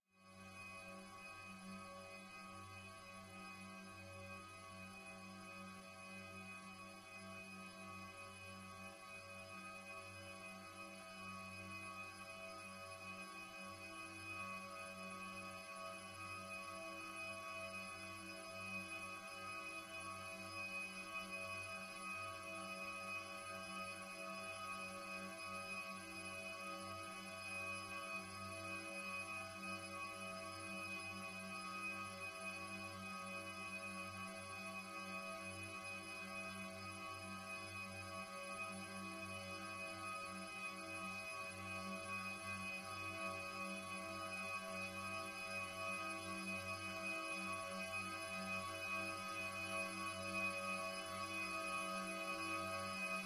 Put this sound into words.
Glass stretched
stretched out waveform of a wine glass whistle
atmos, glass, hum, humming, whistle, Wineglass